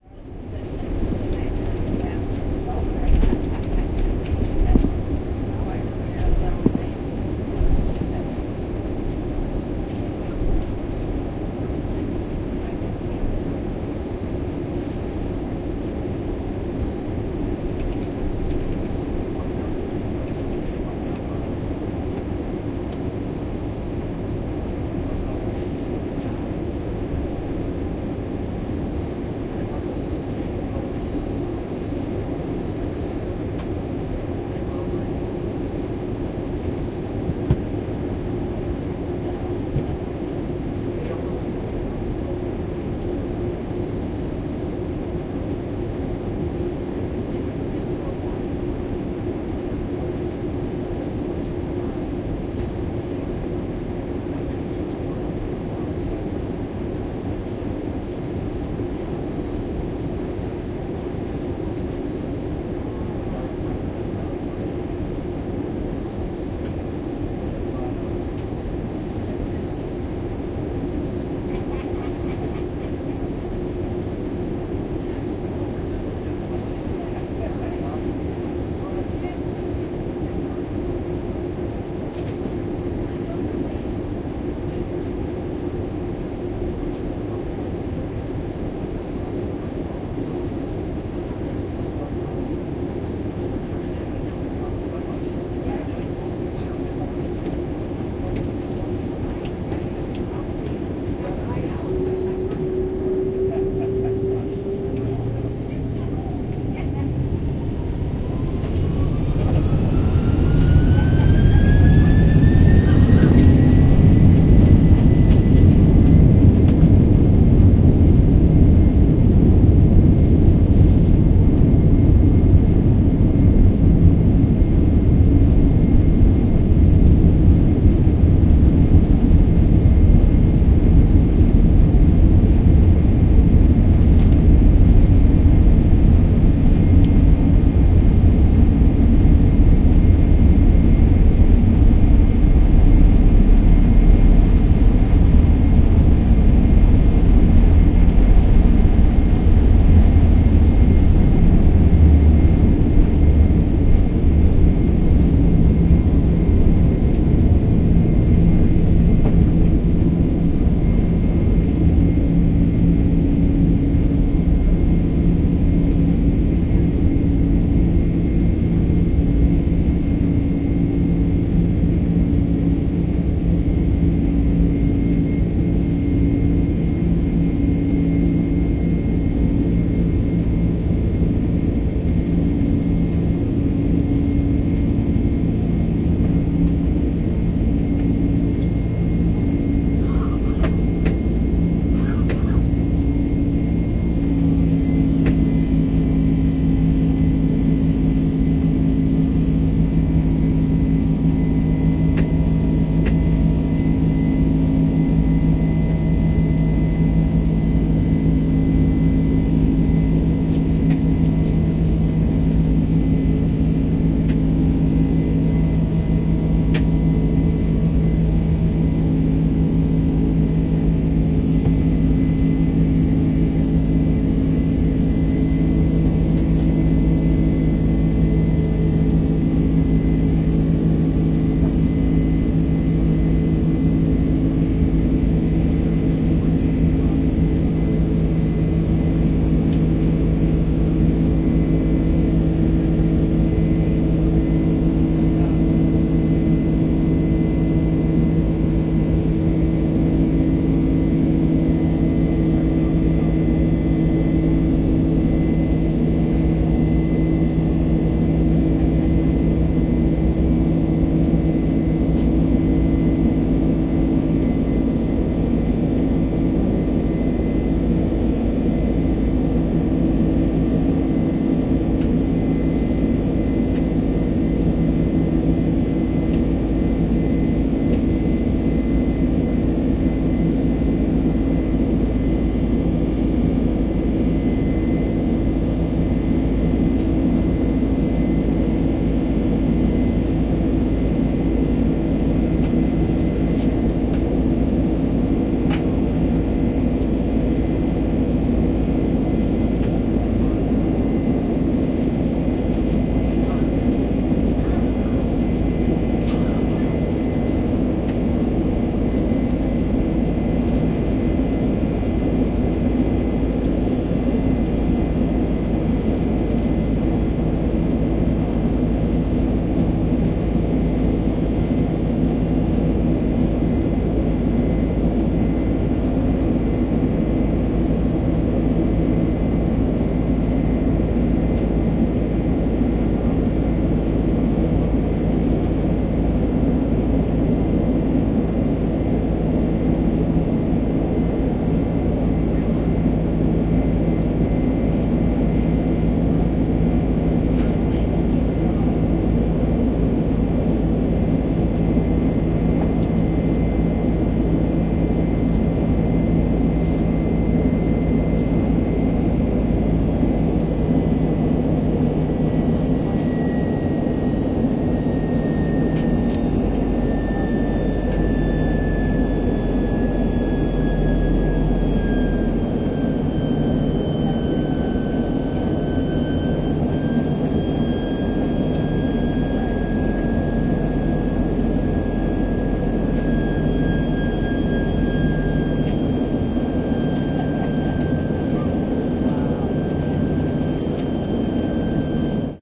Boeing 767 take-off from Heathrow Airport (internal - business class)
Sound recorded of a Delta Airlines Boeing 767 taxiing and taking off from Heathrow Airport. Internally - recorded from a seat in business class (oo posh!)
767, aircraft, airplane, boeing, flight, internal, jet, plane, take-off